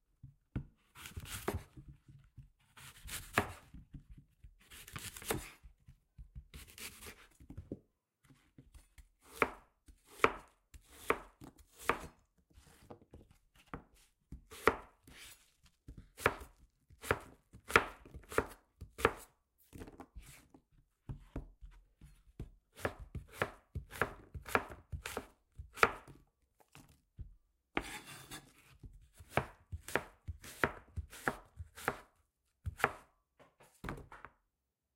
Cutting Apple
apple, cutting, gala